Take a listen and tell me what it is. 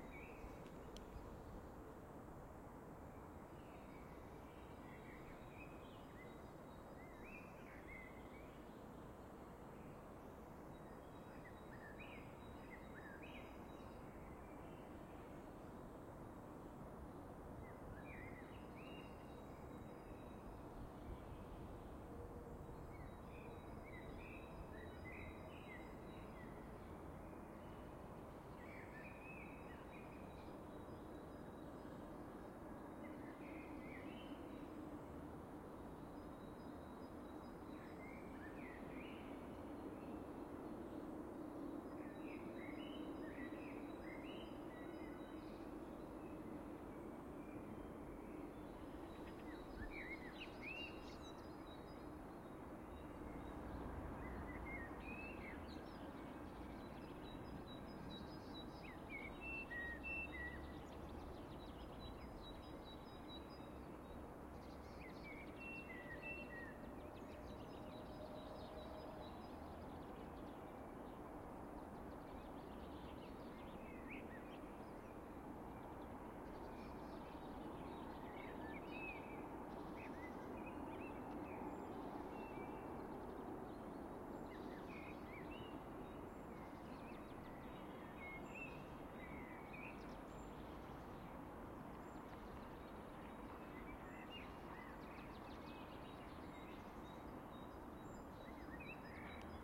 Park 5 mono
Soft spring ambience with birds and distant traffic. Recorded with MKH60 and Zoom H4N.
ambience, birds, park, park-ambience, spring, spring-ambience, springbirds